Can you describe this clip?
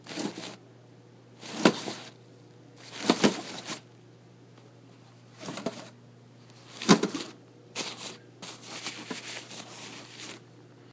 Pushing in and retracting a napkin dispenser, while full of napkins. A soft sound accentuated by slight metallic overtones in the background.